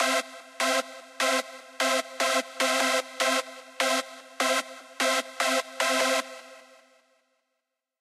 Chord Stab Lead (150 BPM: Hard Dance/ Hardstylez/ Hardcore)
This is a chord stab lead created using two instances of Sylenth1 and third party effects, ideally suited for Hard Dance tracks.
150-BPM, Chord, C-Major, Dance, EDM, Electric, electric-dance-music, Hard, Hardcore, Hard-Dance, Hardstylez, Lead, Music, Stab